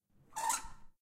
Geology Pinecone Bannister Squeak
This recording is of a pinecone rubbing down the wooden banister of a staircase in a library
stairs, squeak, rub, pinecone, stanford, banister, aip09, stanford-university